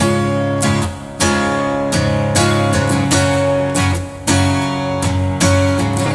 acoustic gutar
funny, free, acustic, gutar